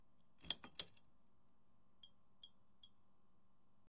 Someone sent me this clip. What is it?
Pulling a chain light switch to turn on.